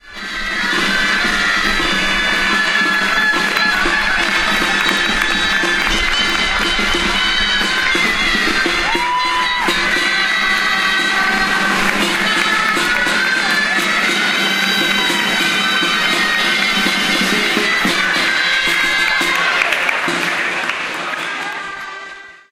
Castellers Pl Ajuntament
This sound recorded with an Olympus WS-550M is the sound of the ambient during a human castle in the main square of Figueres-
castle, flutes, folk, human